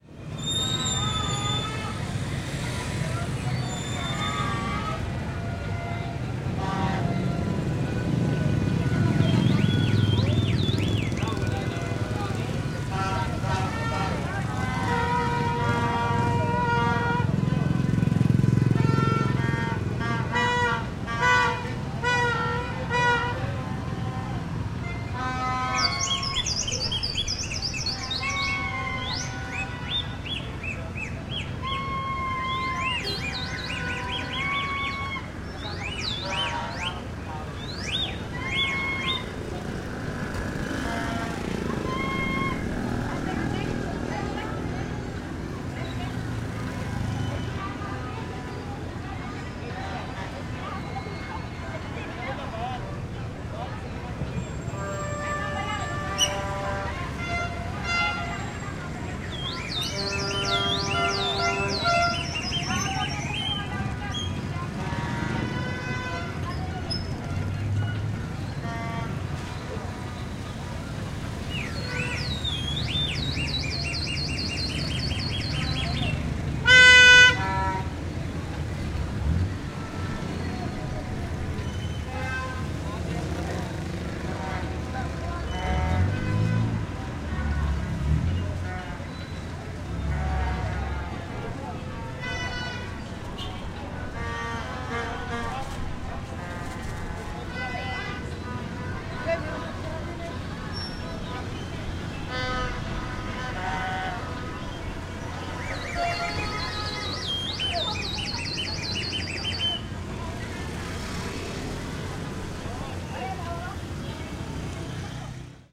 LS 33498 PH Street
Street ambience before new year in Philippines.
I made this recording during the afternoon of December 31st of 2016, in a street of Calapan city (Oriental Mindoro, Philippines).
You can hear heavy traffic, many people talking, walking, and using horns.
Recorded with an Olympus LS-3 and a Luhd PM-01M mono microphone (Primo em172).
Fade in/out and high pass filter 120Hz -6dB/oct applied in Audacity.
ambience, city, field-recording, horns, new-year, people, Philippines, street, talking, traffic, vehicles, voices